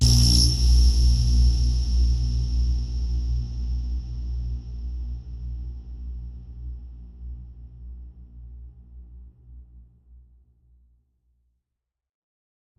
I used SAW SYNTH .ENS from Reaktor pitchthe octave in a weird sort of way to make this High Resonacne electrcity sound.
Scary, Sound, Sounds, Spooky, Creepy, Environment, Electricity, Gun, Effect, Space, Distant, Spacecraft, Machine, Echo, UFO, Outer, Strange, Sci-Fi, Monster, Alien, Voices, Radio